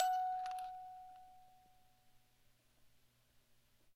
MUSIC BOX F# 1
3rd In chromatic order.
chimes, music-box